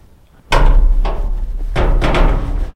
Metal door 01
Original track has been recorded by Sony IRC Recorder and it has been edited in Audacity by this effects: Paulstetch, Tremolo and Change tempo/pitch
iron, house, steel, door, metal-door